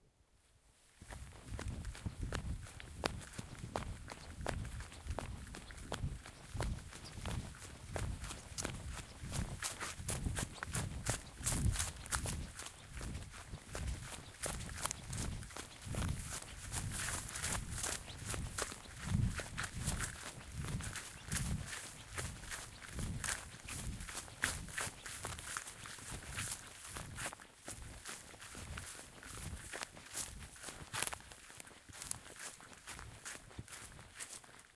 Running through countryside
Unedited recording of running through a field.
sand, running, ground, footsteps, steps, grass